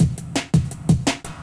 jungle1 175bpm
Catchy little drum 'n' bass beat. Enjoy!